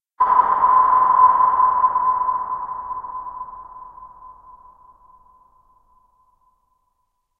Sonar hits submarine. Inspired by the german movie "Das Boot" (1981).